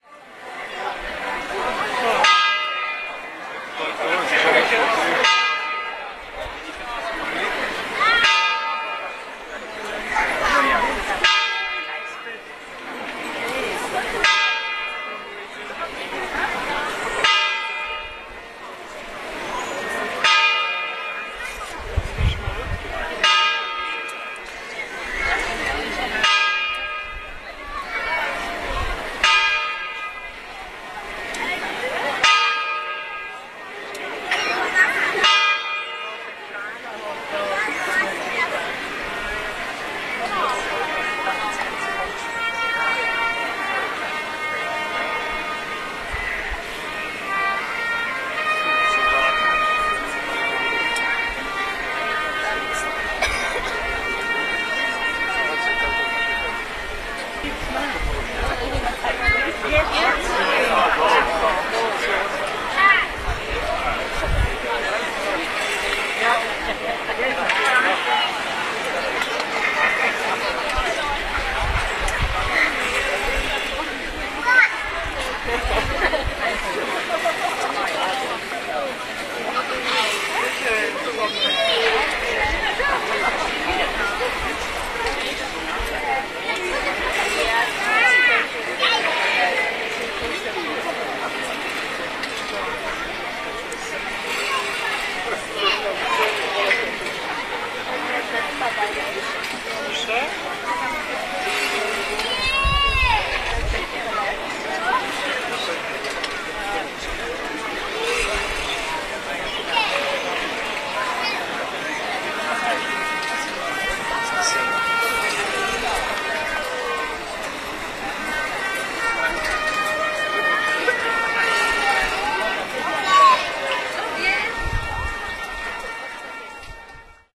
12.00 in Poznan040910
04.09.2010: 12.00, Market Square in the center of Poznan/Poland. in front of the Town Hall. the Town hall clock strikes the noon. That clock is a tourist attraction in Poznan. every noon from the town hall tower two goats come out (every strike of clock is one goat's butt).
poland, poznan, crowd, goats, town-hall, bugle-call, people, clock